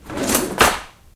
drawer open1
Sliding the cutlery drawer open
kitchen, cutlery